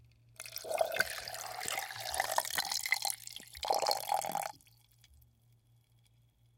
Pour Water into Martini Shaker FF296
Pouring liquid into martini shaker with ice, ice and liquid hitting sides of container
ice, liquid, martini-shaker